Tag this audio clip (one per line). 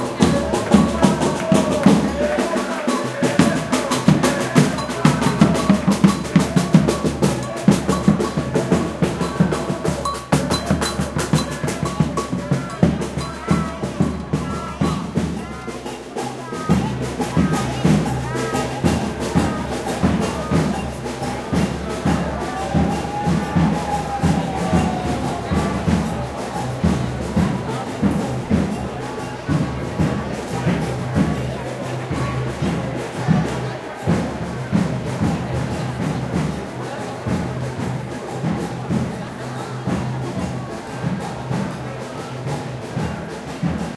brazylian
city
drum
field-recording
maracatu
marching
megaphone
parade
percussion
rhythm
seville
slogans